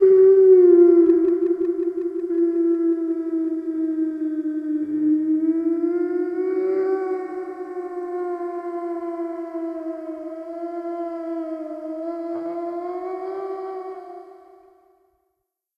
A heavily processed sound of yelping man.
delay, man, processed-sound, reverb, sound-fx, yelping